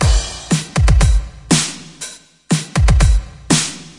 Just a drum loop :) (created with flstudio mobile)
drum, drums, dubstep, loop